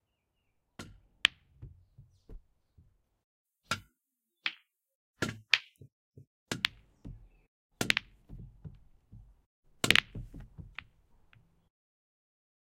Pool Table hit ball with Pool cue and ball roll hits balls
Many different ways the balls hit each other and rolls
OWI, Table, Pool